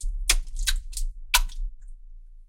Tortillini Splash 1
A collection of 4 sounds of me...well...playing with my tortellini! That didn't come out right. Anyway...They'll make great splat and horror sounds, among other things! Enjoy. :)